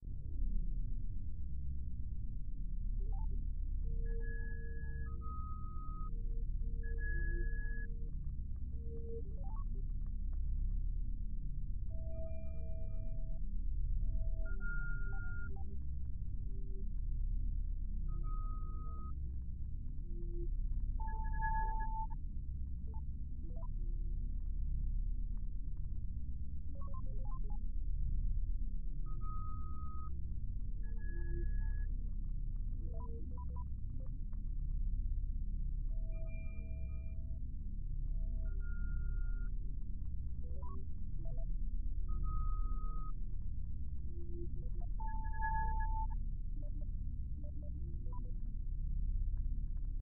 Spaceship Engine - noise + heavy beep
Deep Spaceship Engine Background Noise + heavy Beeps and Blips of the Ship Computer.